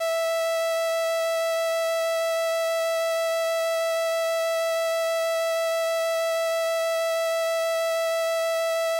Transistor Organ Violin - E5
Sample of an old combo organ set to its "Violin" setting.
Recorded with a DI-Box and a RME Babyface using Cubase.
Have fun!
70s, analog, analogue, combo-organ, electric-organ, electronic-organ, raw, sample, string-emulation, strings, transistor-organ, vibrato, vintage